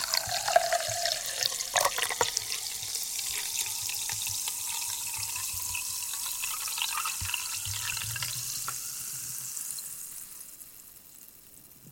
Pouring carbonated drinking water into glass. Recorded with studio condenser. Some compression added.
bottled, fizz, pouring, glass, water, fizzle, drinking, bubble